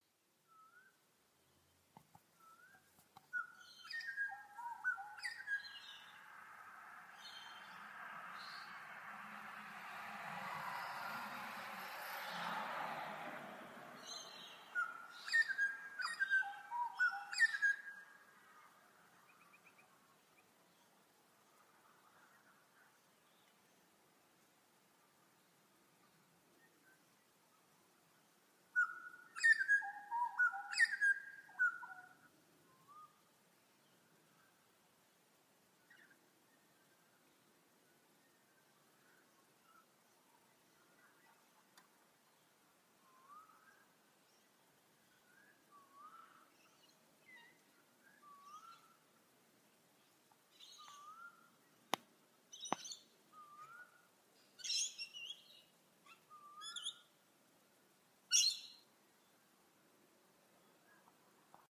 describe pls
A sound of bird tweets and chirps.